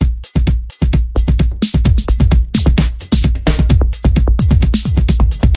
hip hop 13
song loop sample
RB, beat, rap, song, hip, Dj, sample, loop, dance, hop, sound, lied, disko